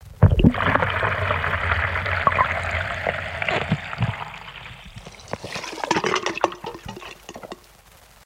Experiments In Sink 1
I placed a small electret condenser mic in a plastic bag and submerged it in the sink. I then removed the plug, this is the sound, at first from under-water then dry as the glugging starts...now I am not happy with the fidelity and am tempted to put an expensive stereo mic in there, what have I started?
water, experiment, glug, sink, plug, suck, gurgle, sucking